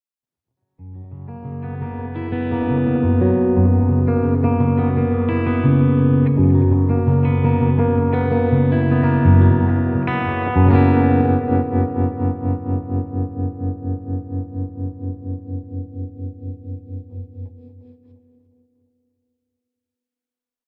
F#add11 moment
A fingerpicked F#add11 chord with tremolo added at the end for suspense.